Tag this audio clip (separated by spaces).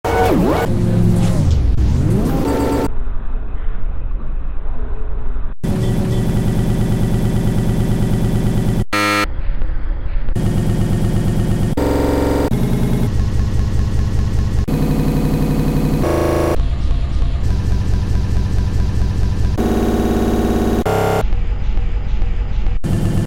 air time altered outside field-recording glitch moments